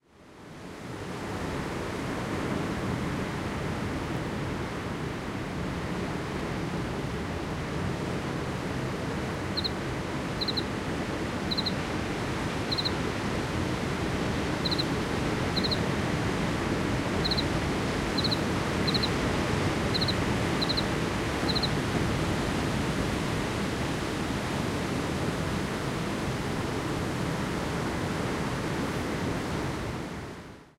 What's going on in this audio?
crickets-night-morocco-waves
Recorded ambiance for a surf movie I was producing in Morocco a few years ago. Recorded with H4N
nature, coast, seashore, wave, morocco, water, beach, crickets, africa, summer, sand, seaside, night, insects, waves, field-recording, ocean, shore, cicades, ambiance, relaxing, splashing, splash, ambient, sea, breaking-waves, surf